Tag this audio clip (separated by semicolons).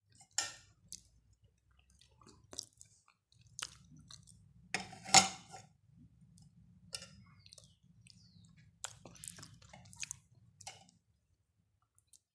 Eat; Food; Woman